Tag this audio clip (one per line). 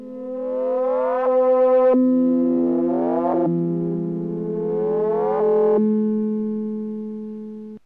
drones
experimental
forum
noise
software
sound
synth